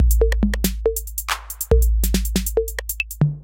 70 bpm drum loop made with Hydrogen
electronic, beat